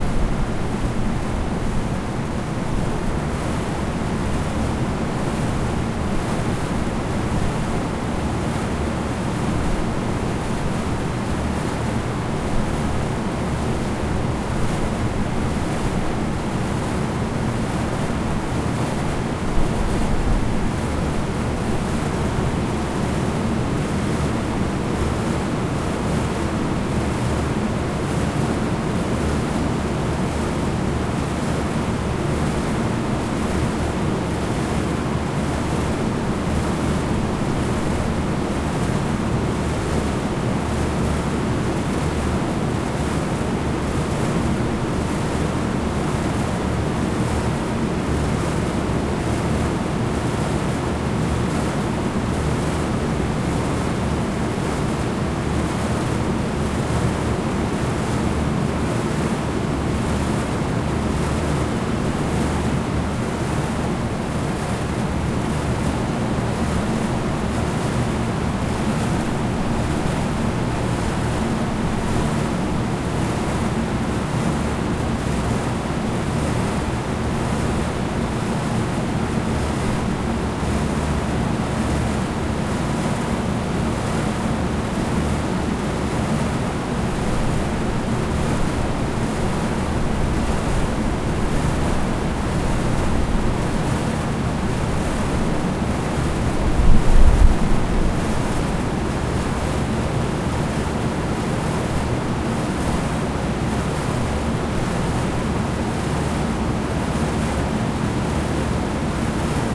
Outdoor Industrial Fan 3
Recording of the 3rd set of 3 industrial air-conditioning fans cooling a warehouse building. Recorded with a Zoom H5 from about 3 feet away.
industrial, noise, mechanical, fan, wind, ambient, factory, field-recording, drone, machinery, warehouse, machine, ambience